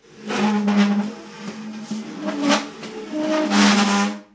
chaise glisse2
dragging a wood chair on a tiled kitchen floor
dragging, floor, furniture, squeaky, tiled, wood